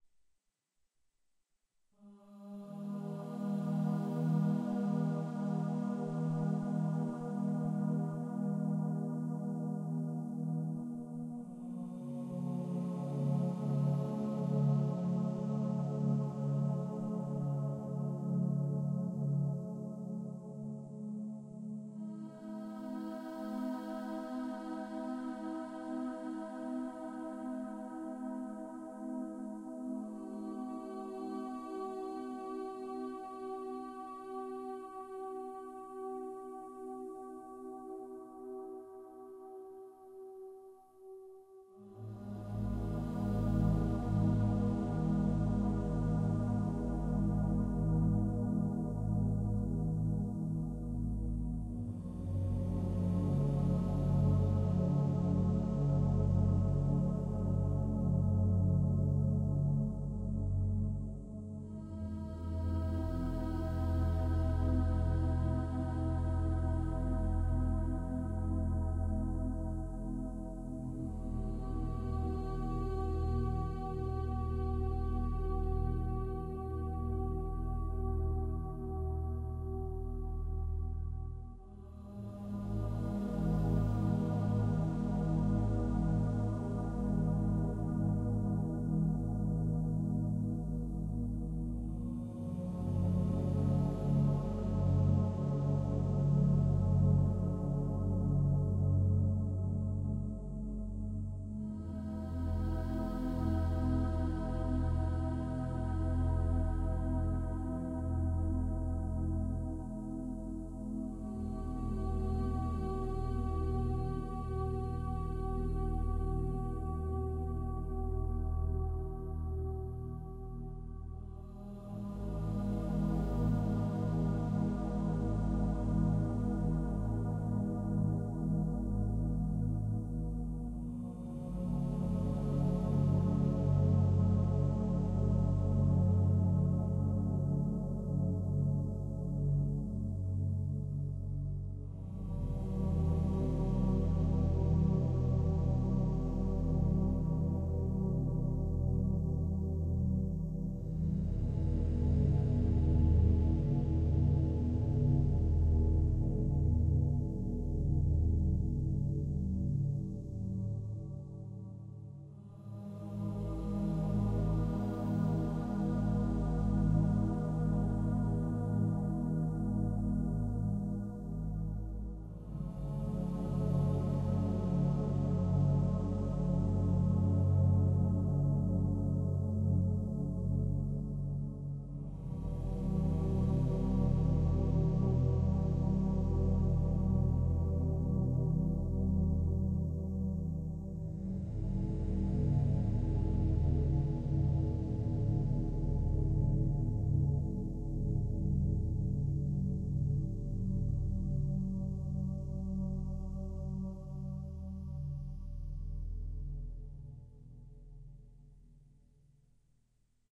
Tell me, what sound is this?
relaxation music #34
Relaxation Music for multiple purposes created by using a synthesizer and recorded with Magix studio.
voices, relaxation, meditative